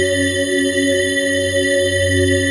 Bight, Breathy Digital Organ made with Reason Subtractor Synths and Logic Drawbar Organ. 29 samples, in minor 3rds, looped in Redmatica Keymap's Penrose loop algorithm.
Digital, Multisample, Breathy, Bright, Organ